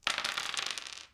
A dice roll from a series of dice rolls of several plastic RPG dice on a hard wooden table. This one features a little bit longer release time. Recorded with a Sony PCM M-10. I used it for a mobile app.
recording, effect, roll, dice